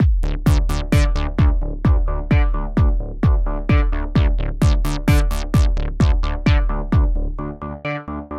Synth Arpeggio Loop 07 - 130 bpm
Synth Arpeggio 01
Arpeggio Loop.
Created using my own VSTi plug-ins
dance, minimal, electro, loop, arpeggio, sequencer, electronic, house, club, drop, glitch-hop, trance, dub-step, arp, techno, synth, rave, acid